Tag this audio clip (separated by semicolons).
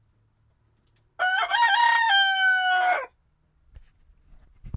bird; chicken; cock; cock-a-doodle-doo; crow; crowing; field-recording; rooster